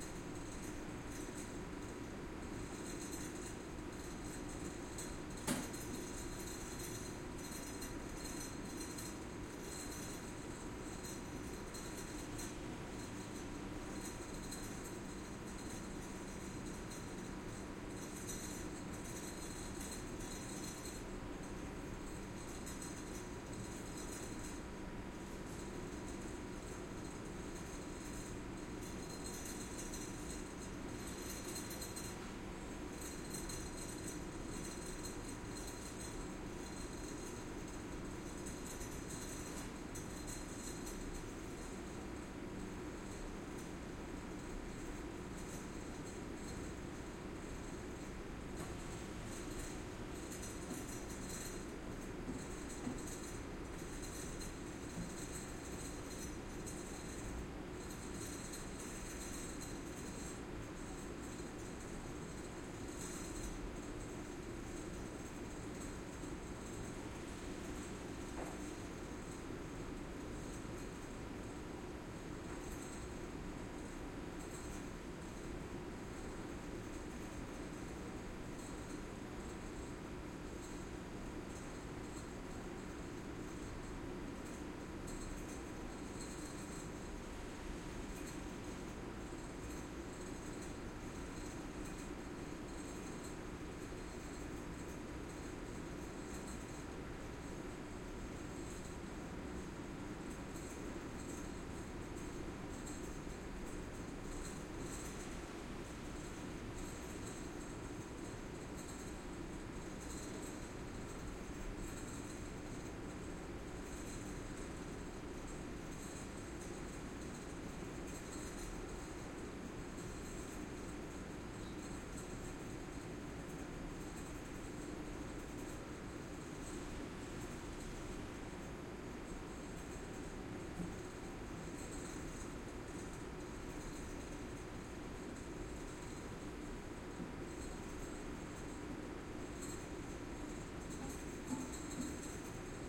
Basements, general ambiance with metalic clanking and distant filtration system
Strasbourg in the old Public bath basements. A general ambiance with metallic clanking , filtration system.
Stéréo ORTF Schoeps